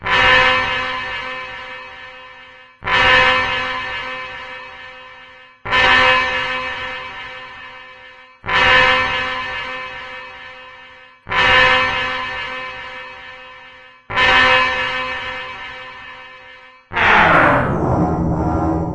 Ari-Ze
Short, synthesized brass bursts, with synthesized turntable warping at the end.
brass, dub, mellow, ragga, reggea, simple